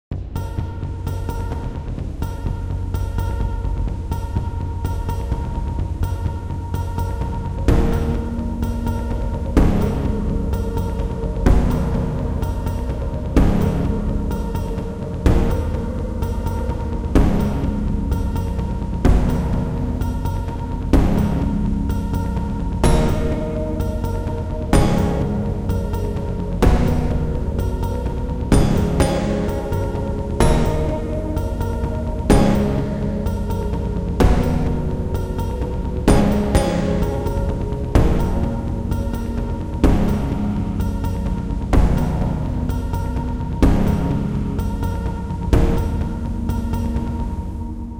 Electronic Cinematic Music
Action, Beat, Cinematic, Dark, Delay, Drone, Electric, Keys, Music, Sci-Fi, Techno